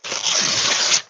Paper Ripping
A piece of paper being torn
paper, rip, tear